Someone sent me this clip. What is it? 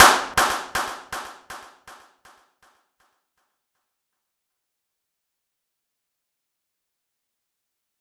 Clap 2 - Delay
This is a record from our radio-station inside the rooms and we´ve recorded with a zoomH2.
Clap, Delay, ZoomH2